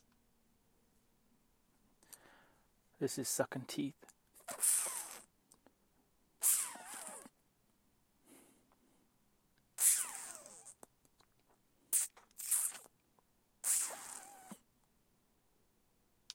sucking teeth
suck, teeth